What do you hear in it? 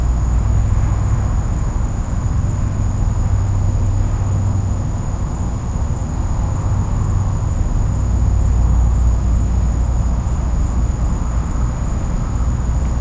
The frogs and insects at night recorded with Olympus DS-40 with Sony ECMDS70P.
field-recording, insects